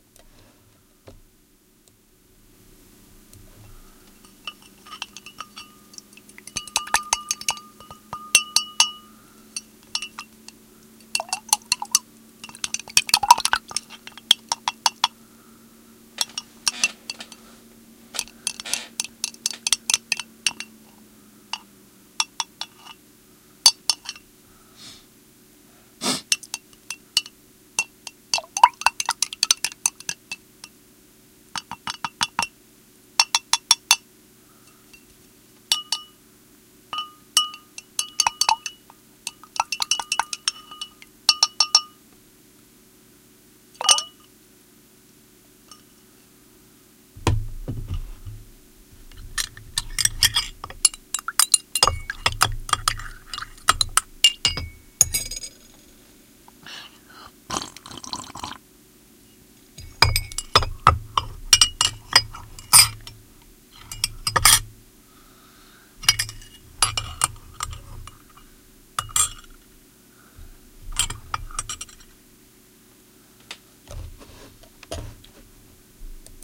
water cups and spoons
I needed the sound of someone rinsing watercolour brushes in a jar of water and also rattling a teaspoon in a cup. This is exactly what the recording is, captured with a Shure Sm58 and a Marantz hard drive digital recorder.
teacups, water, theatre, video, brushes, cinema, fx, painting, sound-effects, film